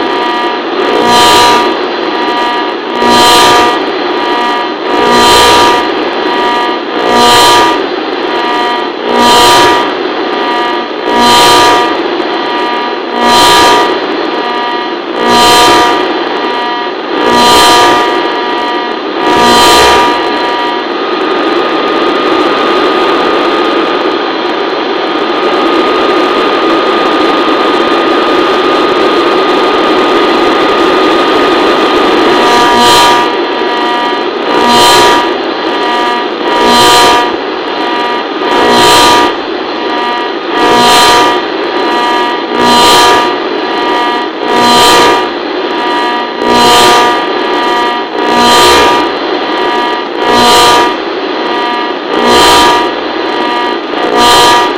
The characteristic sound of a large surveillance radar.
radar, navigation, surveillance